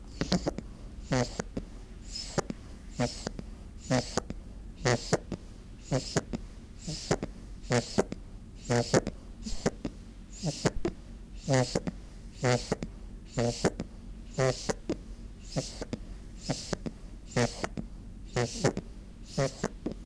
Bunny has his head petted and purrs.